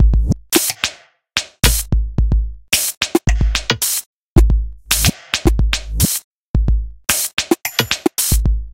TechOddLoop1 LC 110bpm
Odd Techno Loop